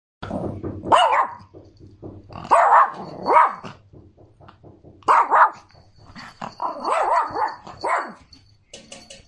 Yorkshire's terrier bark
I recorded from Huawei P40 Lite my little yorkshire dog the moment she heard someone outside and started barking.
dog, yorkshire